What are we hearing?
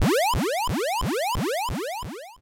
shipdestpart1-chiptone
8-bit, 8bit, chippy, chiptone, lo-fi, retro, vgm, video-game, videogame